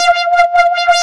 My new sick trap beat